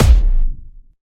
Bomb kick modulated and hard